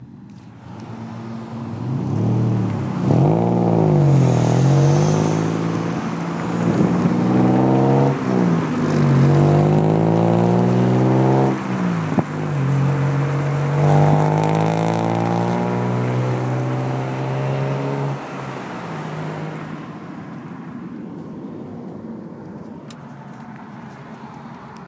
Driving down a busy street next to a loud car in the afternoon.